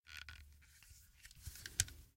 I used this for pulling a gun out of a holster...combined with a little clothing noise.